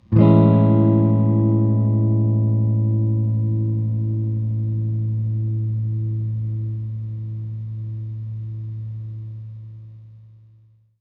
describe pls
guitar chord 01 long
A chord played on a Squire Jaguar guitar. I'm not good at guitar so I forget what chord.